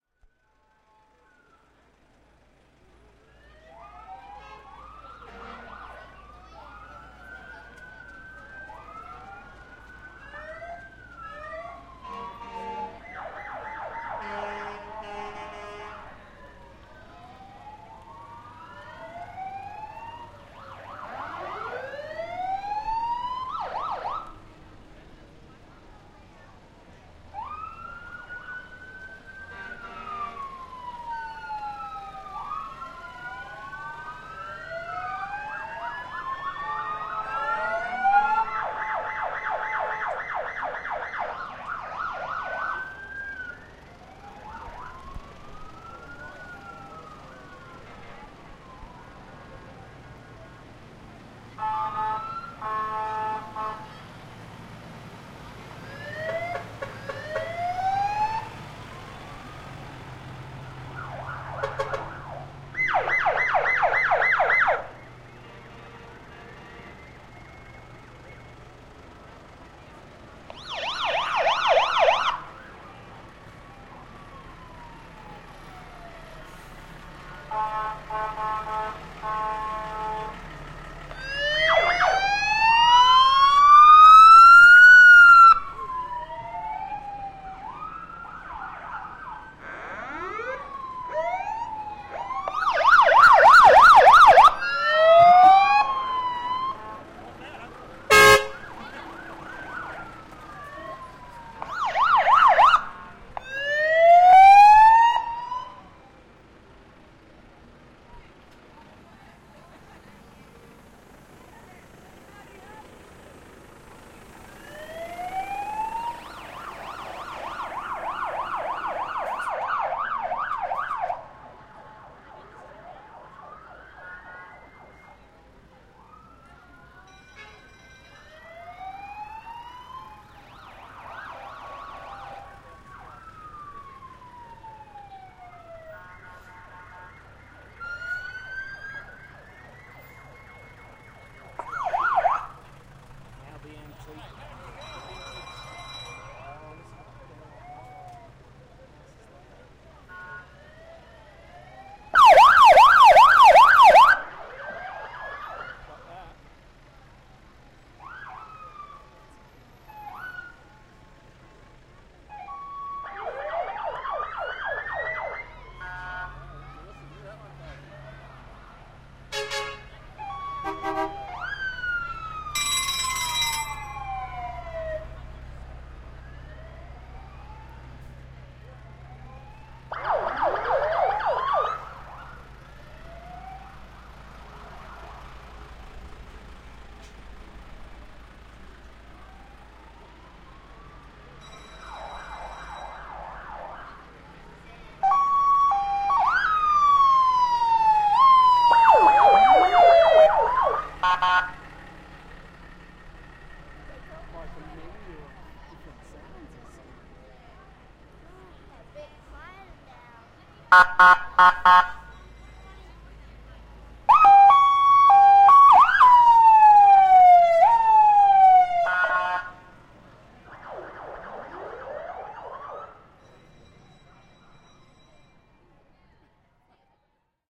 As part of the Castlemaine Show street parade, all the local fire engines were on display. Here’s what they sounded like.